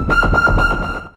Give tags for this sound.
multisample
one-shot
synth

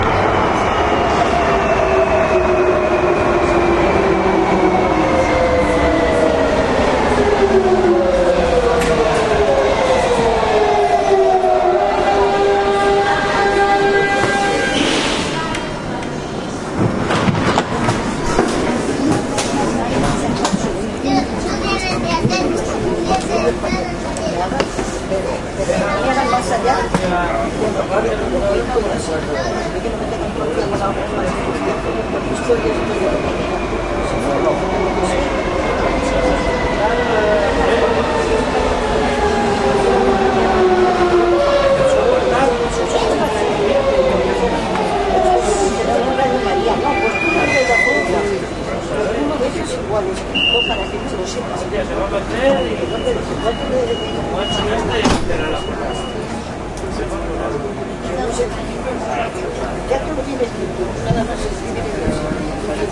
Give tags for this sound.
spanish
madrid
field-recording
train

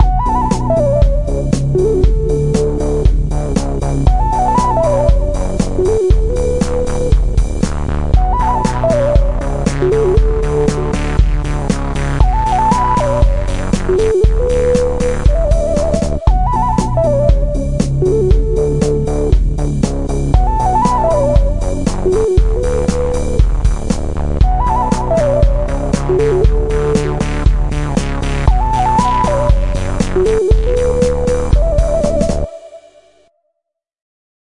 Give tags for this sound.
118bpm
B
ethnic
minor

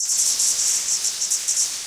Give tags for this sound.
lo-fi
abstract
strange
digital
loop
electronic
sound-design
sounddesign
weird
future
effect
fx
freaky
machine
sci-fi
sfx
sound
noise
soundeffect
electric
glitch

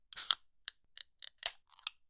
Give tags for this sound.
coke-can
tin-open

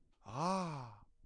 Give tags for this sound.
cartoony
vocal
effects
shout
sound-design
surprise
man
strange
gamesound
sfx
sounddesign
speech
short
foley

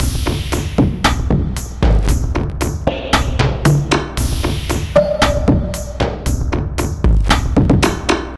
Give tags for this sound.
ambient
dark
industrial
percs

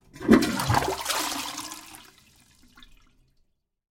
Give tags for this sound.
restroom
flushing
flush
bathroom
toilet
short
water

